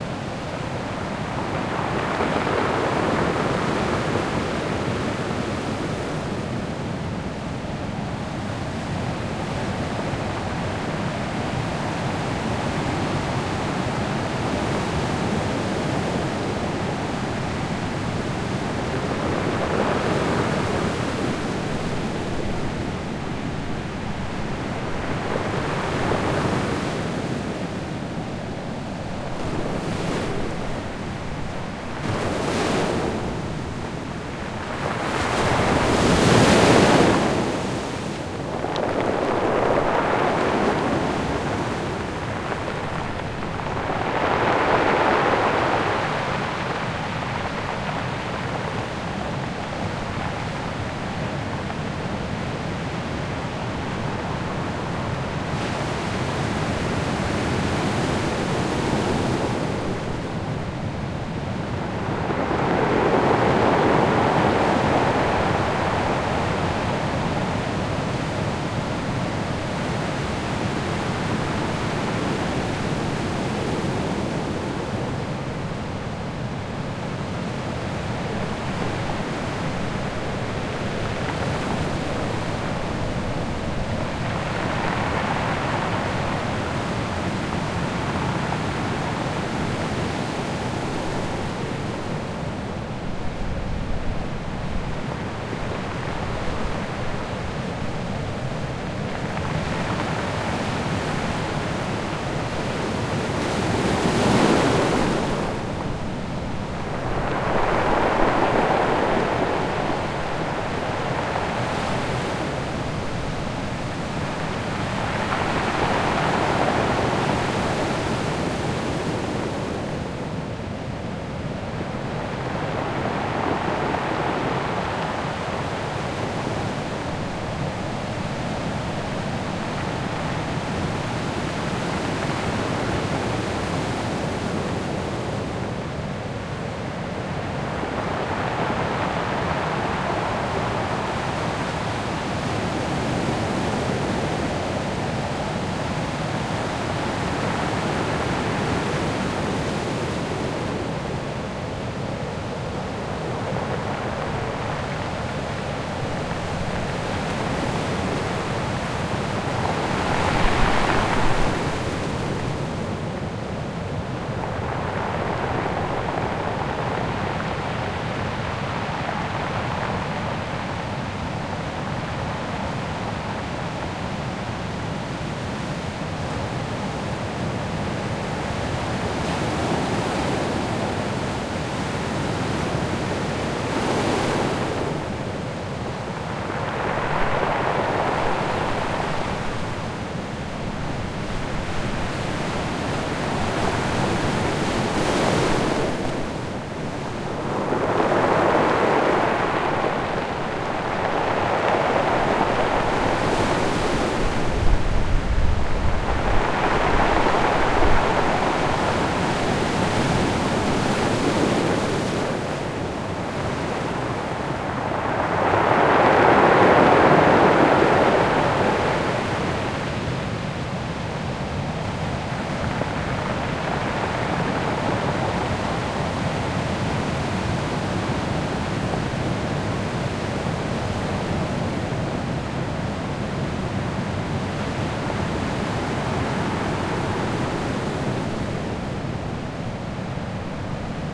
Recorded on a Tascam DR07 Mkii on a Pembrokeshire beach just as the tide reached its maximum point. The unaltered sounds of the sea, foam and pebbles.
No one needs lawyers.